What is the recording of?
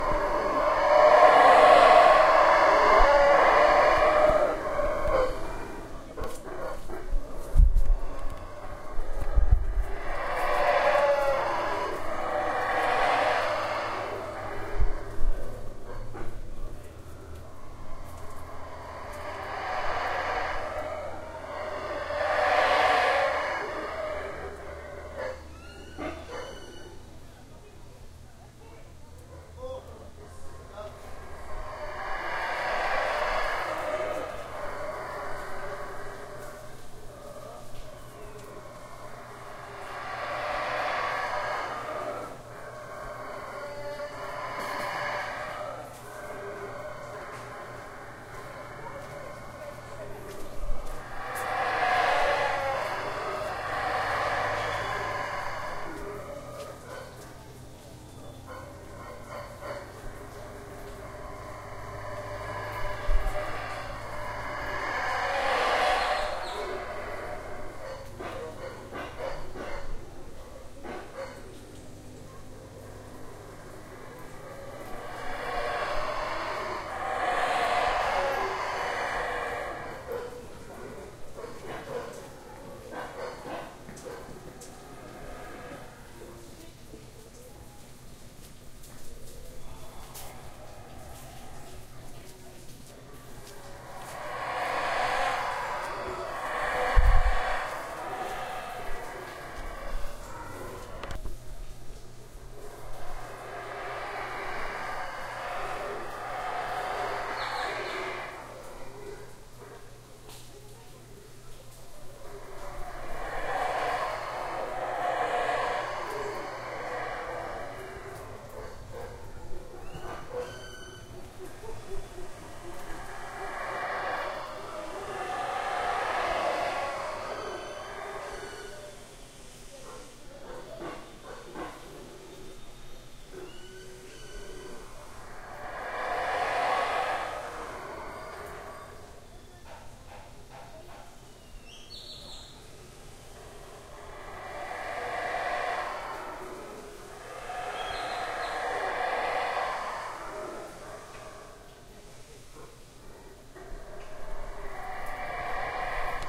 howler monkey jungle 1
Howler monkeys recorded in the jungle in Polenque, Chiapas, Mexico. Sorry but I haven't processed these files at all. Some of these are with the mics in a 90degree X-Y config and some in a 120degree X-Y config.
star, fighter, wars, tie, howler, jungle, monkey